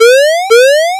An unsuccessful attempt to emulate the 'pull up' warning from an aircraft's ground proximity warning system. Made in Audacity with 440-800hz chirp in square wave... if you know what I mean.